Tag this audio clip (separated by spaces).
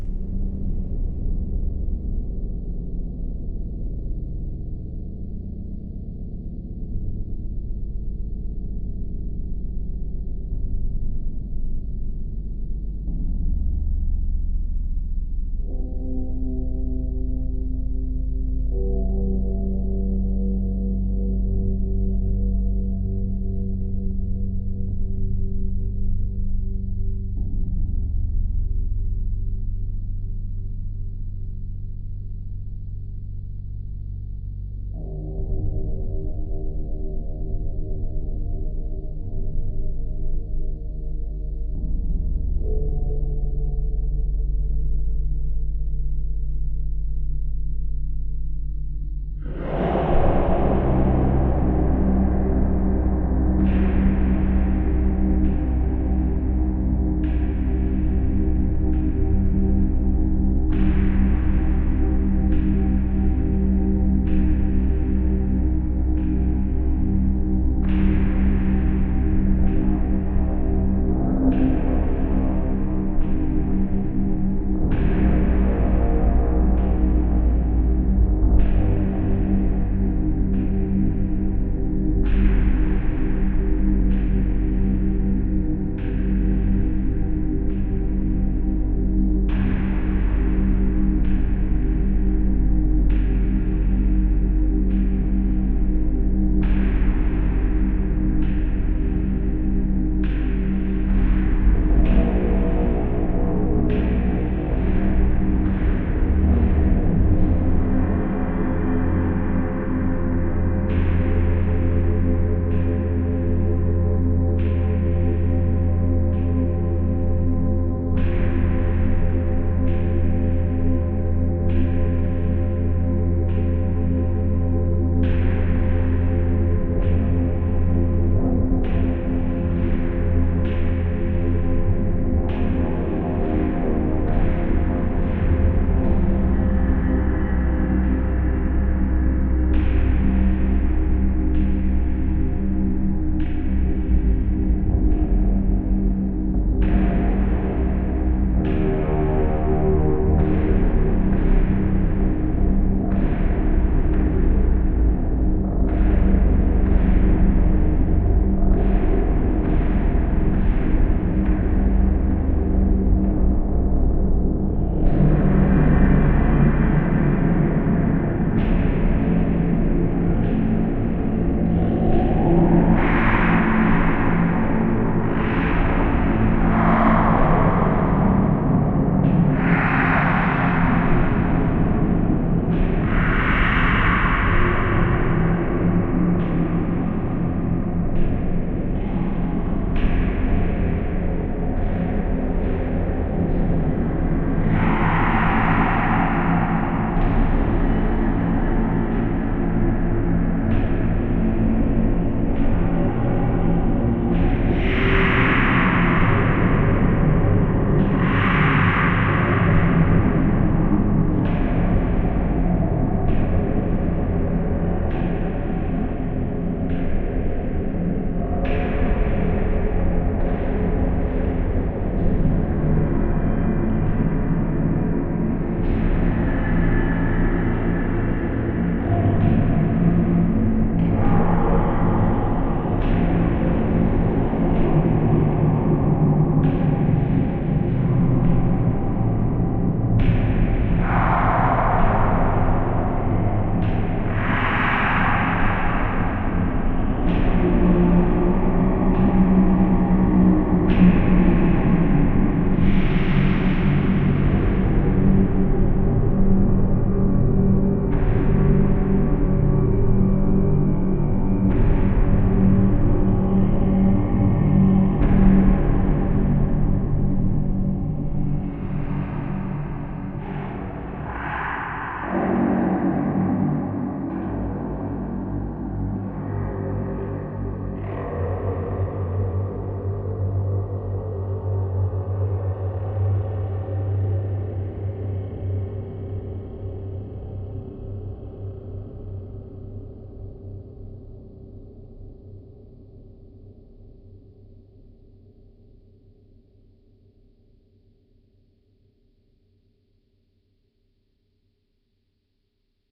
dream space digital divine synth sweet reaktor organ ambient evolving granular freaky drone artificial horror dreamy multisample electronic pad soundscape smooth experimental